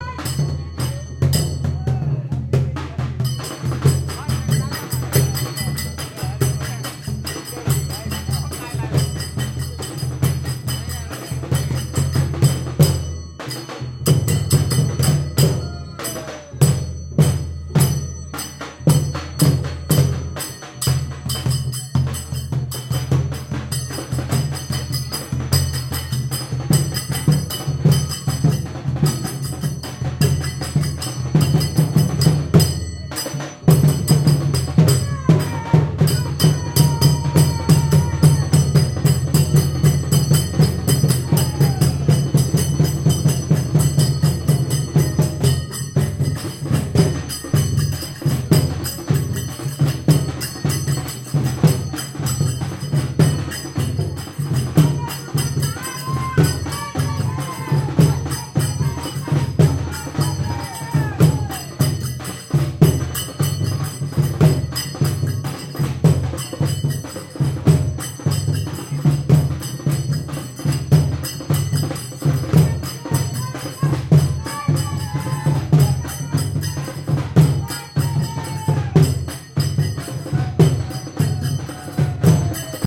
Holy In Paylem village (North Goa, India)
Celebrating Holi in the village Palyem (North Goa, India). Men came to pass with the drums through the village . All dance and rejoice the arrival of spring. Recorded on the Zoom H2.